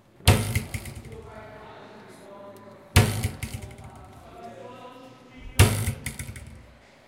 Sounds recorded at Colégio João Paulo II school, Braga, Portugal.